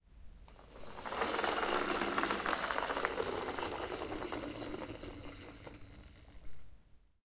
Toy car rolling in
Cheap, medium-sized, plastic toy car rolling on wooden floor. Recorded with Zoom H1.
car, toy-car, vehicle